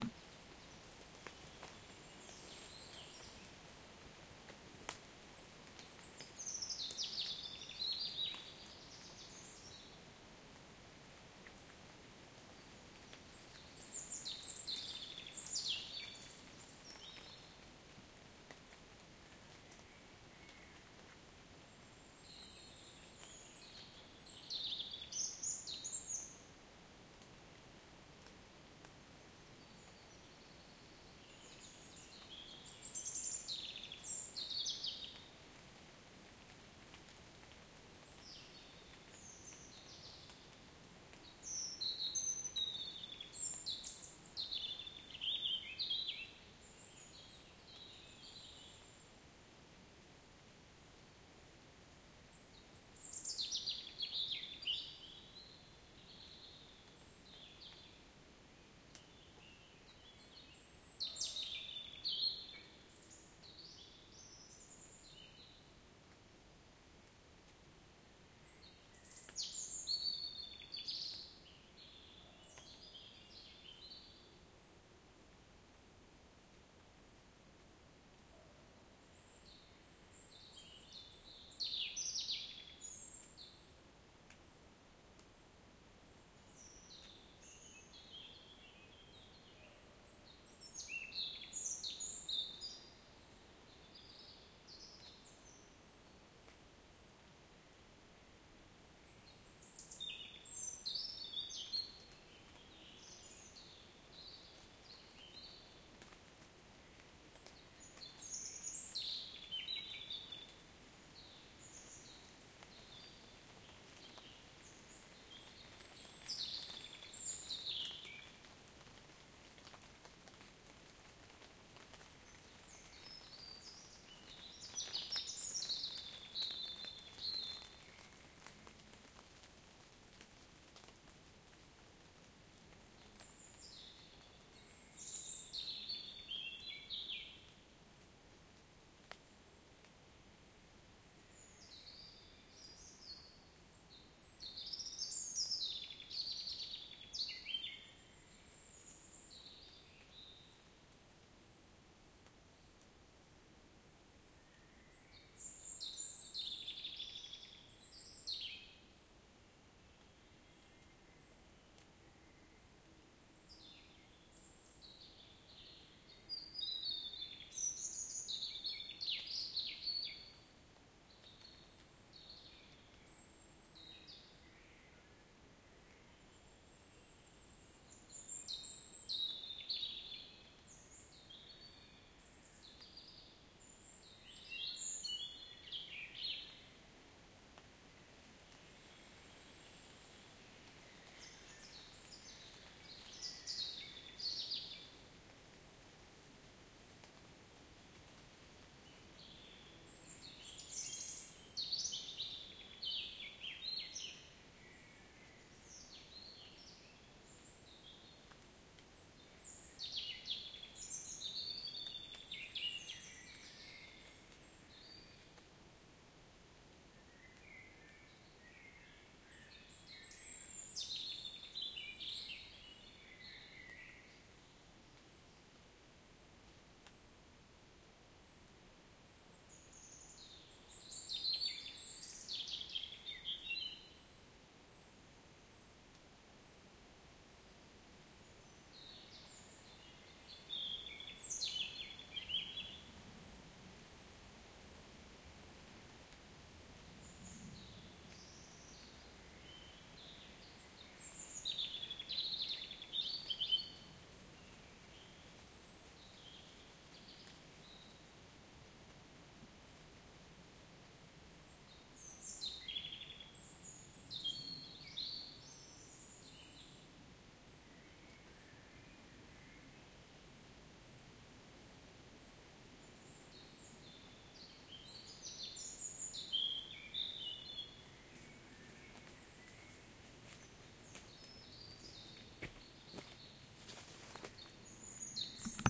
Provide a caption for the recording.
the sound of a forest after rain / drops falling on ferns rear
rain, a, field-recording, sound, rear, nature, birds, weather, falling, raindrops, water, forest, woods, after, ferns, dripping, drops